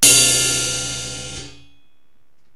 splash hit 2 cut
This was slightly tapped on my 17" ride cut off by hand again
crash, cymbal, e, funk, heavy, hit, ride